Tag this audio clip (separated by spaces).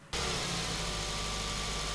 automobile car carro engine motor neutra neutro